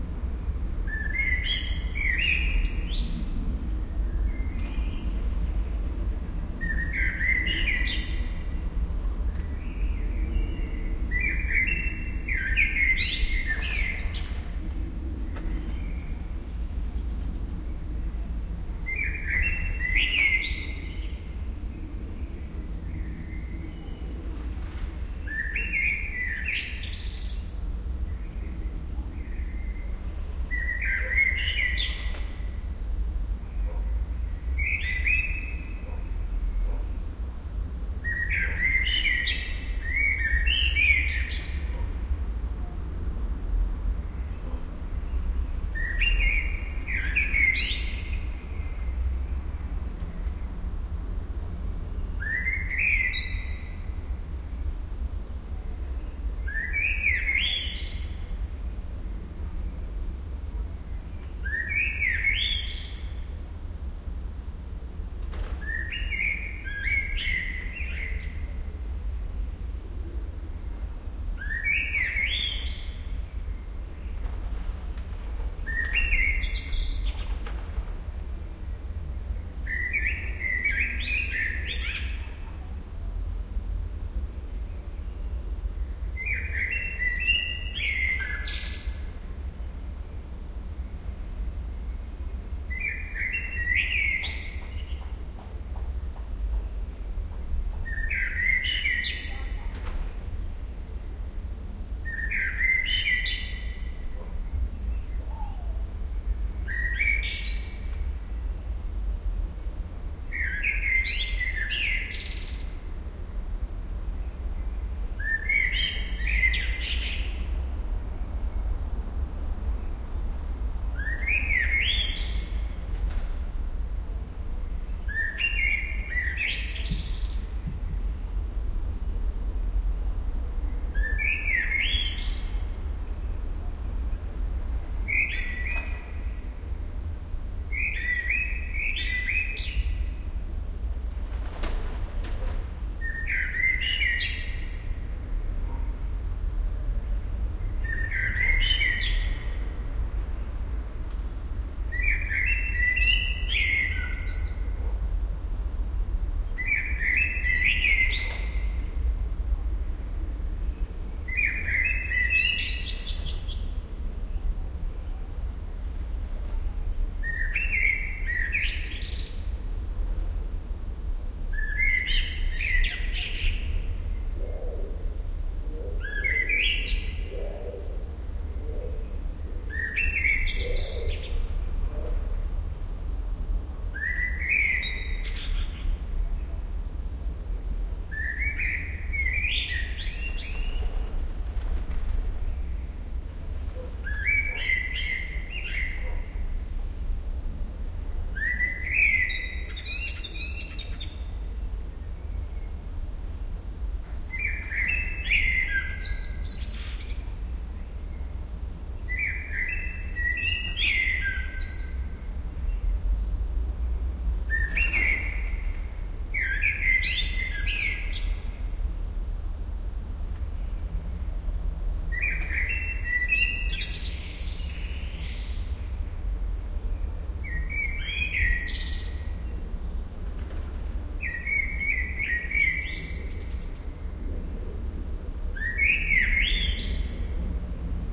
The best time of year to record those blackbirds is the springtime. This track was recorded in the middle of April.
For this I use some Panasonic microphone capsules, I had fixed to a board, which are about 50 cm apart, covered with a DIY windshield, the rather good FEL Battery Microphone Amplifier BMA1 and the iriver ihp-120 recorder.